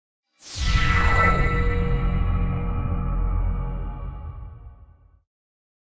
Magic, Spell, Sorcery, Enchant, Appear, Ghost.
appear, fantasy, Magic